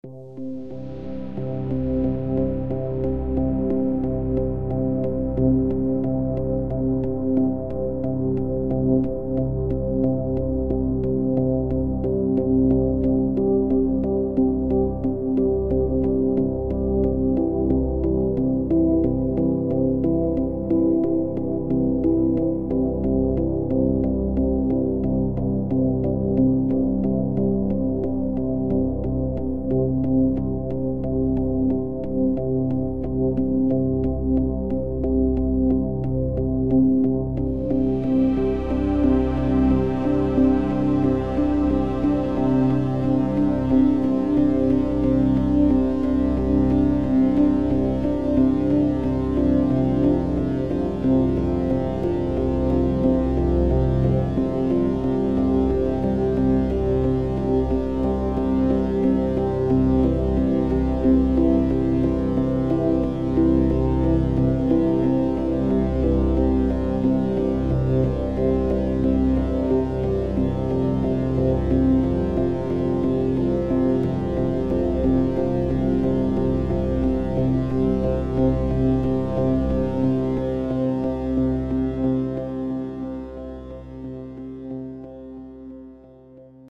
Evolving legato synthesizers accompanied by a warm flowing pad
and a subtle non-intrusive melody